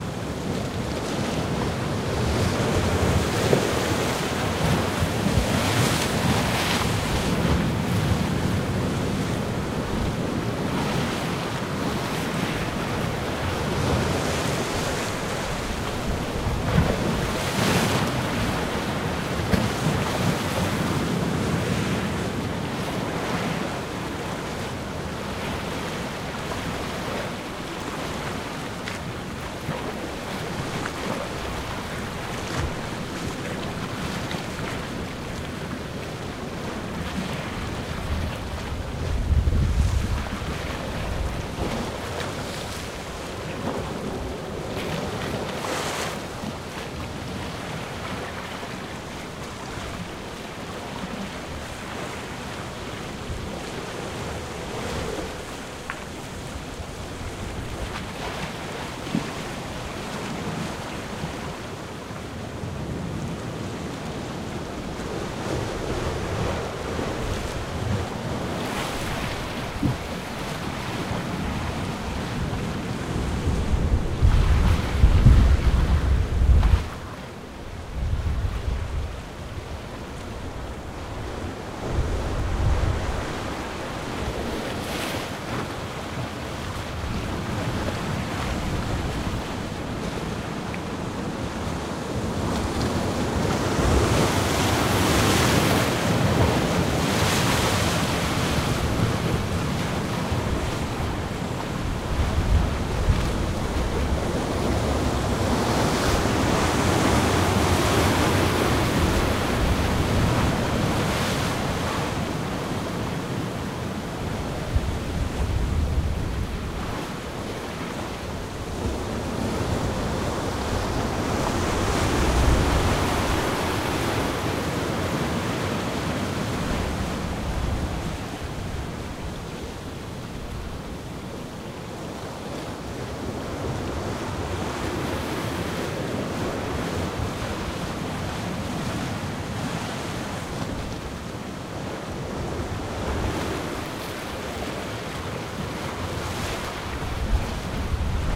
storm sea close
Heavy wind recorded at the coast of western Norway